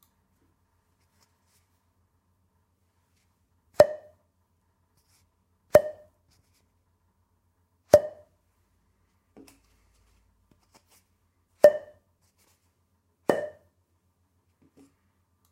A series of "Plopp" sounds
Using a finger and a small Blackroll tube, I recorded a few plopps with a Rode NT-USB mini and the Rode Podcast recording software. Noise gate and compression were turned on at the time of recordings. For me, it sounds a little bit like a cork popping from a bottle, but it has a richer sound, somewhat overdoing it ... good for sfx though.
blobb, bottle, cork, flopp, fx, hit, plop, plopp, sfx